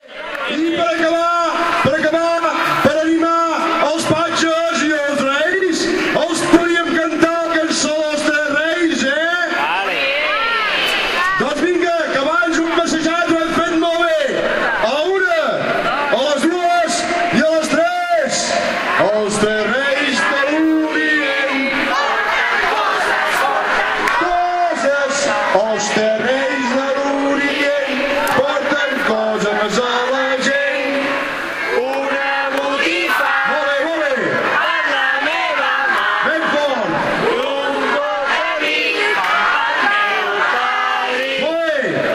This sound was recorded with an Olympus WS-550M and it's the Catalan folk song "The Three Kings of Orient", that people sings to cheer them.